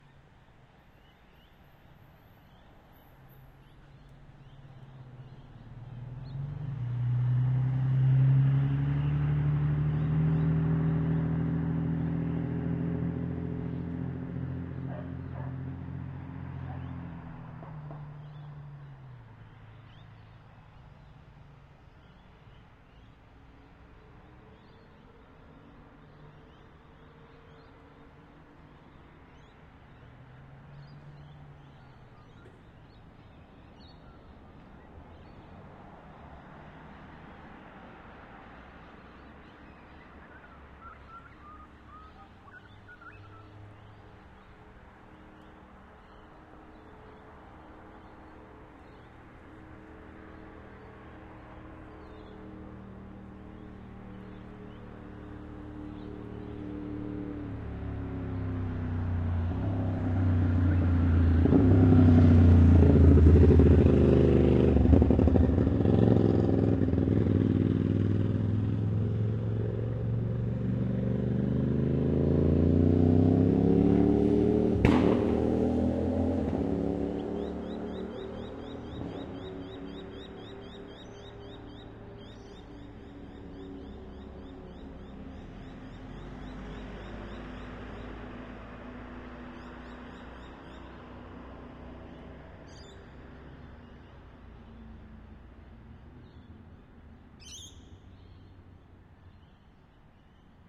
Recording of a Motorbike driving by and backfiring as it accelerates, with occasional birds in the background.
Recorded in Brisbane, Australia with a BP4025 microphone and ZOOM F6 floating-point recorder.